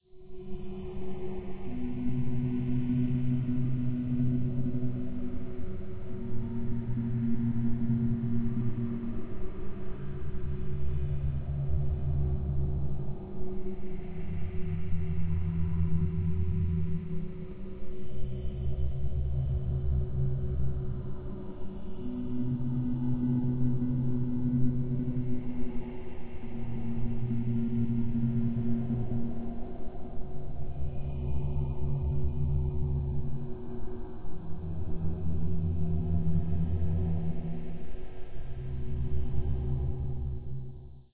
Eerie Tone Music Background Loop
A quiet track of random tones with hushed, altered voices made into a loop for a sinister scene building up atmosphere. Ambiance might be the best use since it is not music. Made to simulate someone who is "alone." Does not loop seamlessly; has a short pause of silence between loops.
Name: Eerie Tone (5 Layered Loop)
Length: 0:40
Type: Background Loop